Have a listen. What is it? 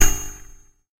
STAB 008 mastered 16 bit
An electronic percussive stab. A burst of distorted noise. Created with Metaphysical Function from Native Instruments. Further edited using Cubase SX and mastered using Wavelab.
industrial, electronic, percussion, short, stab